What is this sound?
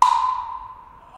Woodblock Distance Wood Block.1
One, recording, ambiance, Elementary